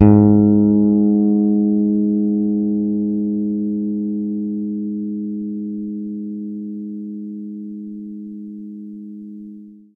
this is set of recordings i made to sample bass guitar my father built for me. i used it to play midi notes. number in the filename is midi note.
bass, electric, guitar, tone